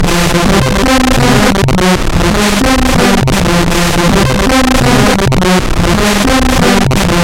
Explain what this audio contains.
circuitbent Casio CTK-550 loop5
casio, sample